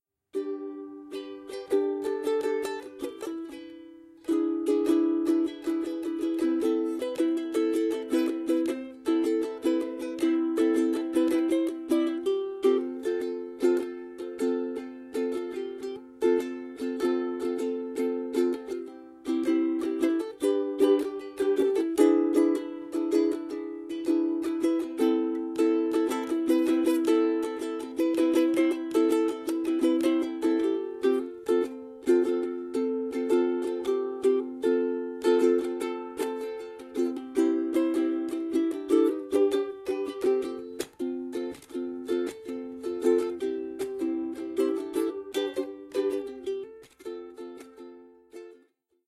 Ukulele short chorded melody
A simple background chord progression on the Uke.
chords, acoustic, jam, short, background, ukulele, ukelele, button, ambient, strings, sound, music, melody